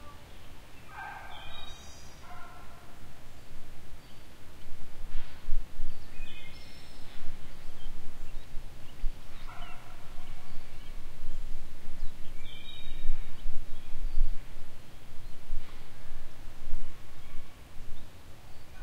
Evening Pennypack Park sounds
Recorded in Pennypack Park outside of Philadelphia, PA, USA, in May 2020.
bird, birds, birdsong, field-recording